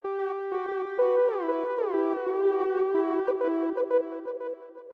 portamento-little-loop
Synth sound created in ICHI. 124 bpm
These loops were created for a track which was a collab with AlienXXX for the Thalamus Lab 'Open collaboration for the creation of an album'
portamento, melodic, 124, electronic, electro, legato, ambient, 124-bpm, synth, loop